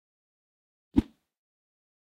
High Whoosh 02
whip, whoosh